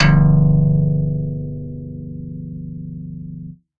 1051 HARD BASS-G1-TMc-
An original electric bass emulation synthesized in Reason’s Europa soft synth by Tom McLaughlin. Acts as loud samples with MOGY BASS as medium, and MDRN BASS as soft samples in a velocity switch sampler patch.